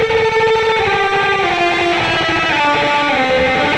Chopped up pieces of a guitar solo stripped from a multritrack recording of one of my songs. Rogue electric strat clone through Zoom guitar effects.
solo, electric